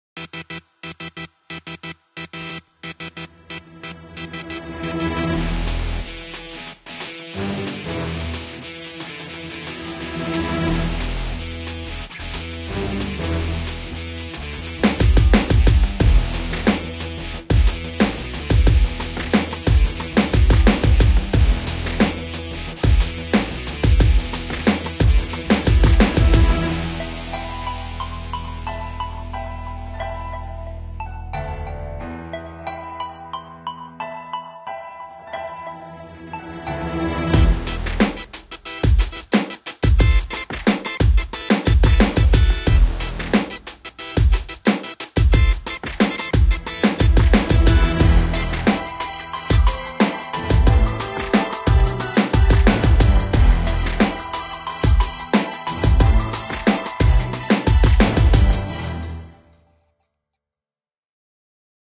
Organ type HipHop beat
Short hip hop track with some orchestral elements
beat, drums, groovy, hip-hop, loop, music, oldschool, orchestral, piano, rubbish